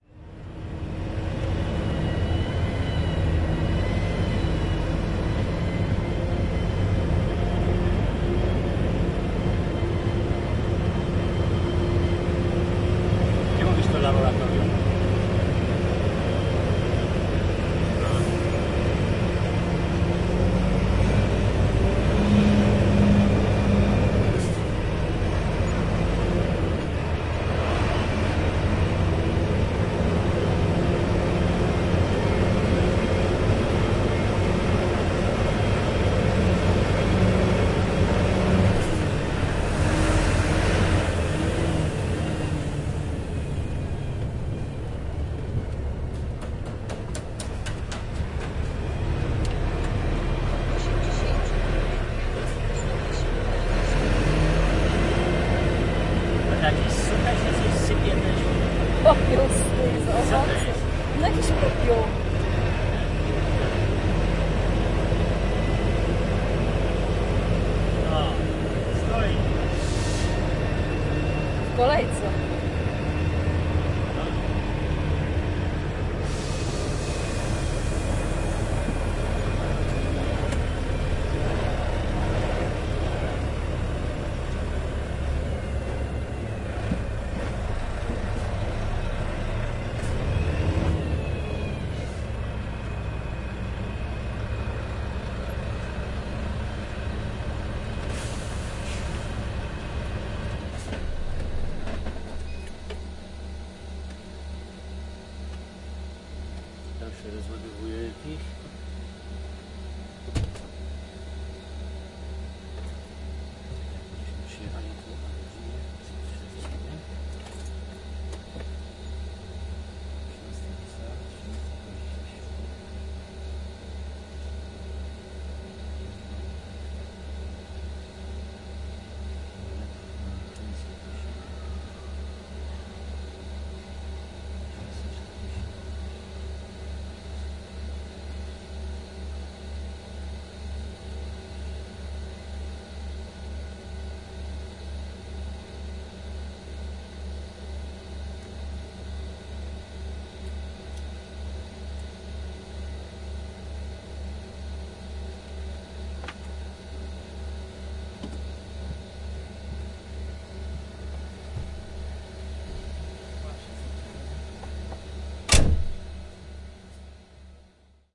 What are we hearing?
110804-entry to the factory
04.08.2011: fifth day of ethnographic research about truck drivers culture. Entry to the fruit-processing plant. Noise made by our truck.
field-recording, truck, neuenkirchen, germany, drone, noise, engine